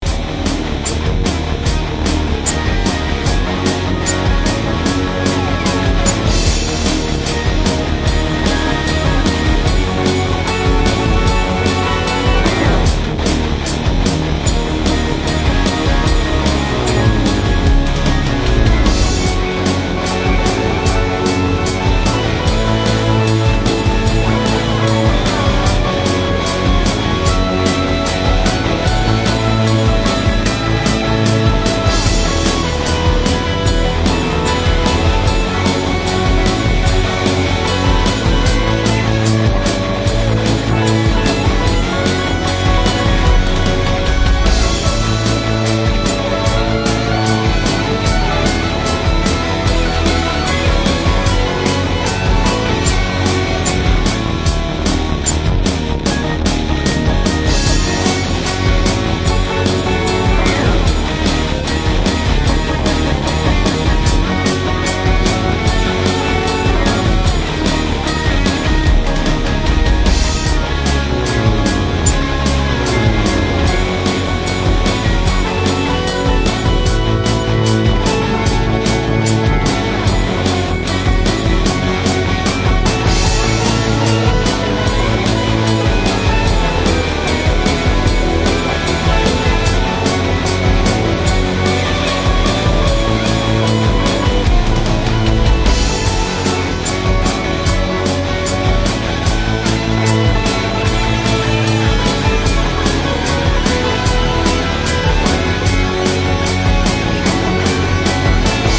Purple Rock Loop
Sounds like a 60's Rock group. I did the bass sequence with Hydrogen drum software and samples from my Yamaha PSR 463. Loops nicely.
Guitar, Loop, Purple, tempo, fast, BPM, Bass, up, Retro, up-tempo, 150, Synth, Rock, Organ